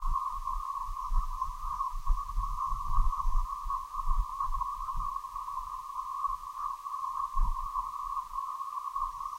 There was a giant swarm of cicadas, and I recorded them. You can hear the individual ones that are close in the background of millions of ones in the distance.